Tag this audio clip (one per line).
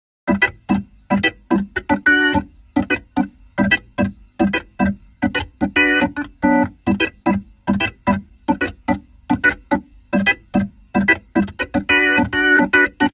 rasta
Reggae
Roots